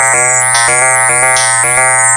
110 bpm FM Rhythm -59
A rhythmic loop created with an ensemble from the Reaktor
User Library. This loop has a nice electro feel and the typical higher
frequency bell like content of frequency modulation. Weird experimental
loop. The tempo is 110 bpm and it lasts 1 measure 4/4. Mastered within Cubase SX and Wavelab using several plugins.
110-bpm, electronic, rhythmic, loop, fm